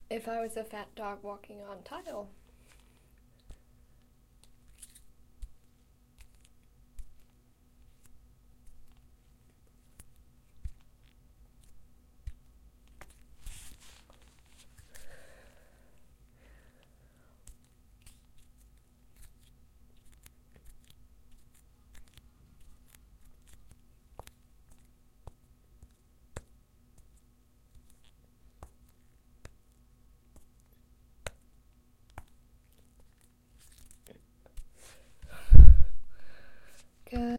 paws on tile
simulated dog-paws padding